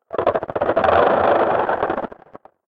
Glitch effect made with FL Studio.
2021.
synth, sfx, experimental, war, terrible, processed, drone, effects, distortion, harsh, distorted, dark, loop, science, old-radio, electronic, radio, vintage, glitch, noise